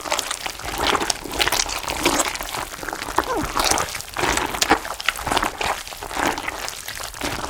Pumpmkin Guts 7
Pumpkin Guts Squish
guts, pumpkin, squish